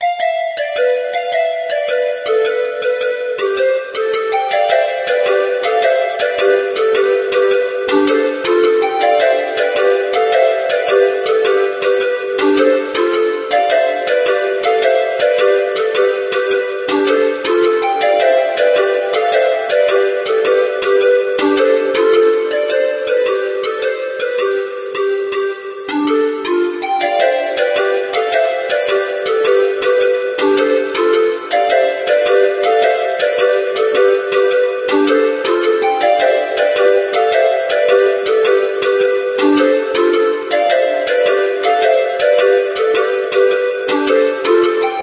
panflute loop
A simple pan flute loop